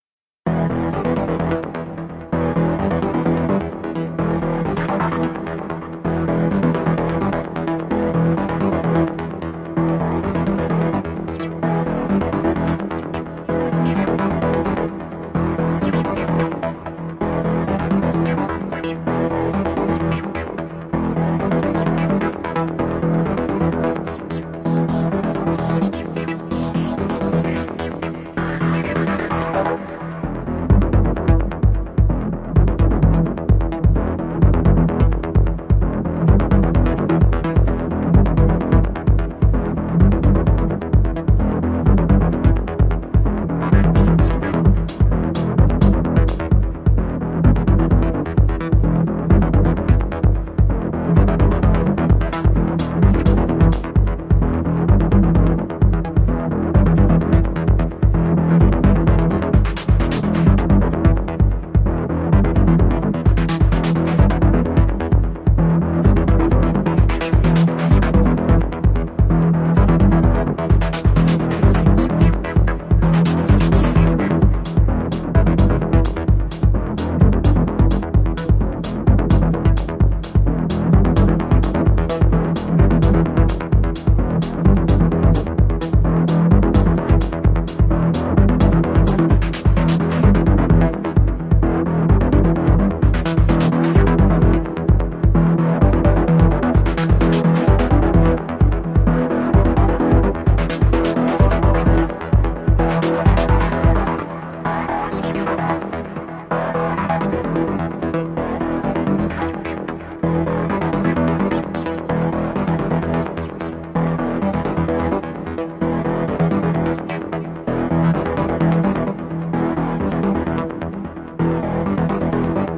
composition of three softsynths a drumkit and some percussions